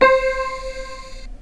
Old realistic concertmate soundbanks. Mic recorded. The filename designates the sound number on the actual keyboard.
samples, lofi, realistic, radioshack, concertmate, keyboard